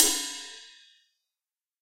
Ottaviano24RideCymbal3005gBell
Ottaviano ride cymbal sampled using stereo PZM overhead mics. The bow and wash samples are meant to be layered to provide different velocity strokes.
cymbal, drums, stereo